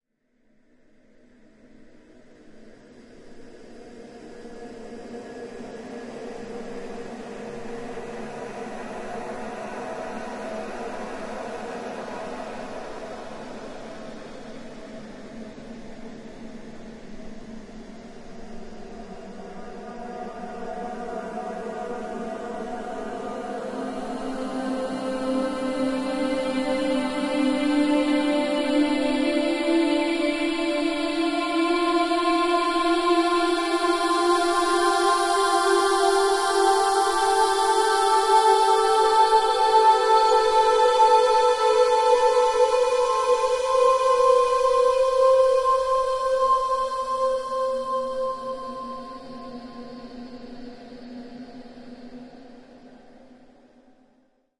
An ethereal sound made by processing a sample of a female voice.
female, atmospheric, ethereal, floating, choir, synthetic-atmospheres, emotion, blurred